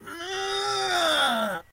A sound a man makes when lifting.